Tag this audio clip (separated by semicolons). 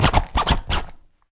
0; egoless; natural; noise; scratch; sounds; vol; zipper